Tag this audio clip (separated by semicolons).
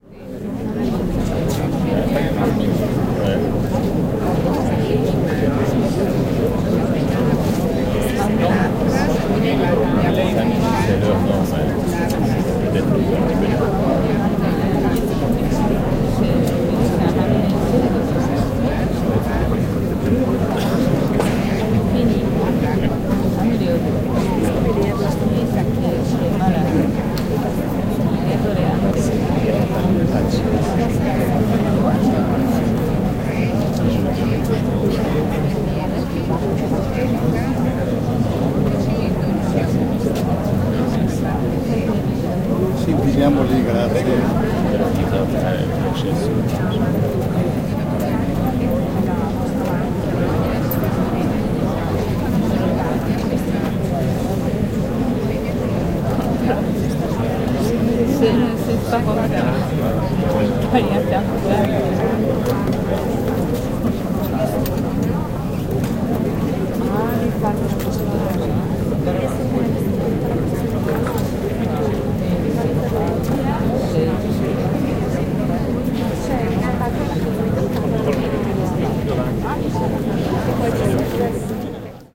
ambience; atmosphere; people